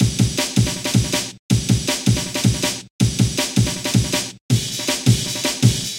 Custom dnb loop
Made with amen drums
breakbeats, Amen-break, amen